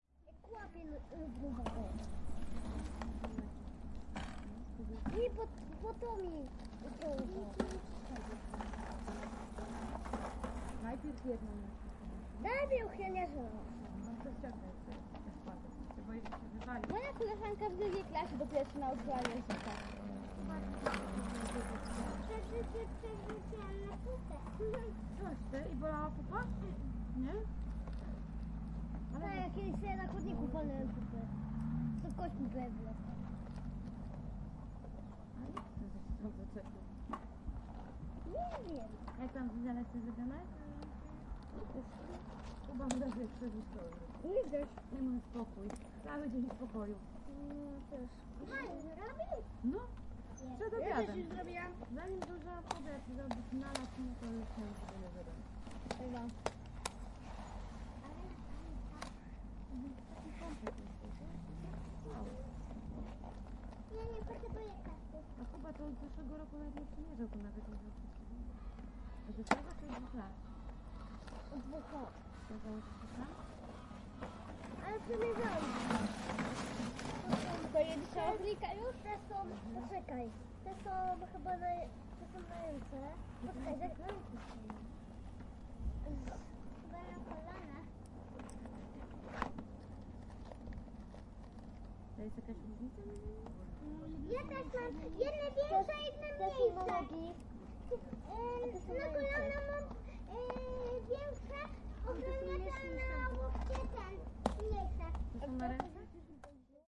Fieldrecording made during field pilot reseach (Moving modernization project conducted in the Department of Ethnology and Cultural Anthropology at Adam Mickiewicz University in Poznan by Agata Stanisz and Waldemar Kuligowski). Sound of playing childeren on Warszawska street in Torzym (Lubusz) near of the national road no. 92. Recordist: Robert Rydzewski. Editor: Agata Stanisz. Recorder: Zoom h4n with shotgun.